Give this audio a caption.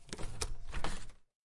Office door. Recorded with Zoom H4n